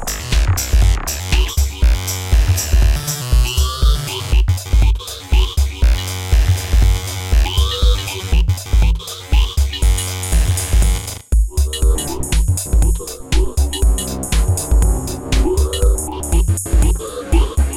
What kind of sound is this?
Vocal Like Beats 3
A beat that contains vocal-like formants.
High-Q, Beat, Complex, Vocal-like